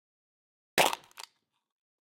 Smashing Can 01
aluminum, beer, beverage, can, drink, metallic, object, soda